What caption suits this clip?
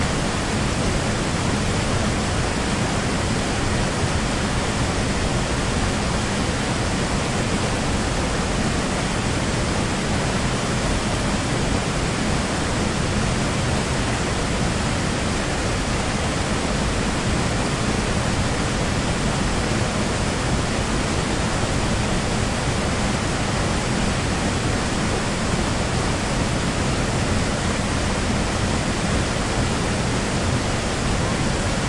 LS 33473 PH WaterFalls
Big water-falls.
Audio file recorded in November 2016, in Tablas island (Romblon, Philippines).
Recorder : Olympus LS-3 (internal microphones, TRESMIC ON).